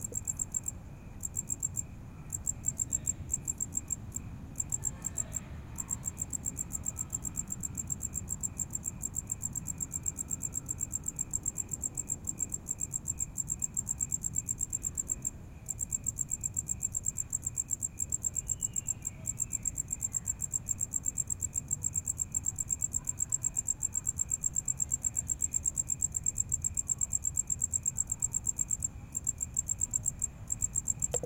Cricket Loud
A recording of crickets at night.
crickets, field-recording, insects, night